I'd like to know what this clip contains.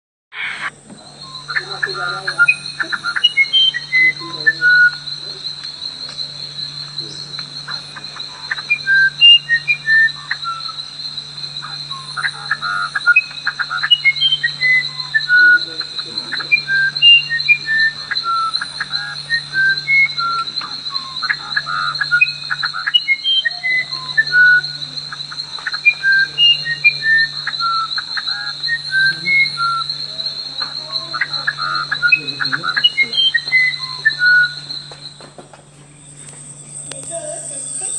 Tropical Bird Sounds 03
Taken during a field trip at the Natural History Museum in Barcelona, Spain (2019). A collection of sounds of different bird species, a few of them already extinct.
rainforest, birds, jungle, tropical, bird, nature, exotic